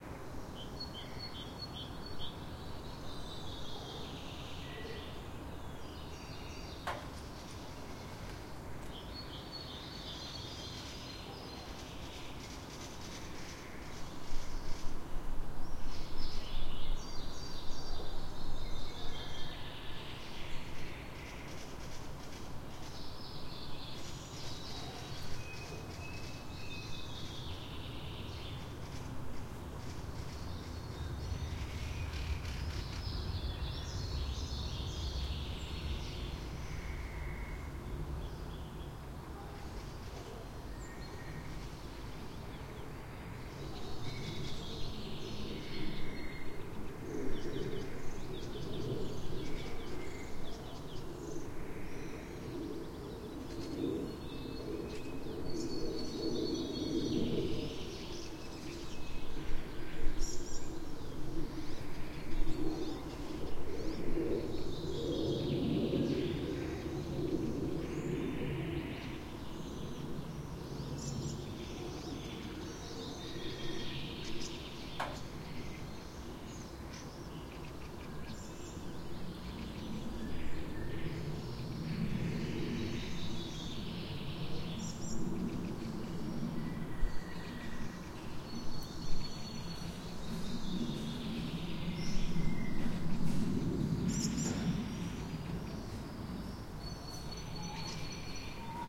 Suburban ambience - Moscow region, birds, airplane pass-by, summer XY mics
Suburban ambience - Moscow region, distant train pass-by, birds, airplane pass-by, summer
Roland R-26 XY mics